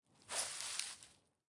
Boots Dirt Foot Slide 1 4
Barefoot, Boots, Design, Dirt, Fast, Feet, Floor, Foley, Foot, Footstep, Forest, Gravel, Ground, Hard, Nature, Outdoor, Park, Real, Recording, Running, Shoe, Slide, Slow, Sneakers, Soft, Sound, Stepping, Walking